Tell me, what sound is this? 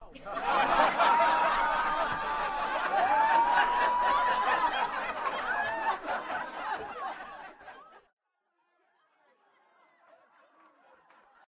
patrons laughing
Audience laughing at performer.
audience, crowd, laugh, laughter